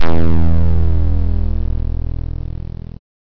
It sounds like a plunked rubber string. low rate
low-rate, power, plunk